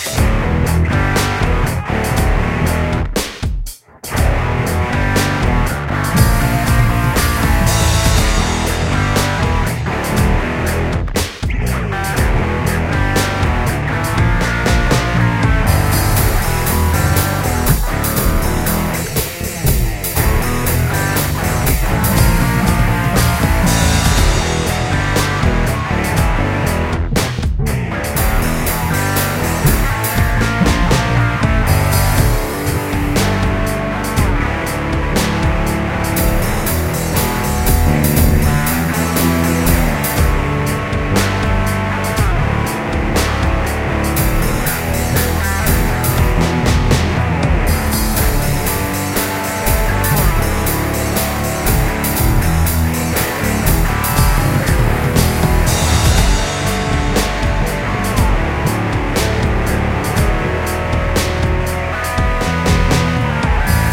A short clip/loop from one of my original compositions.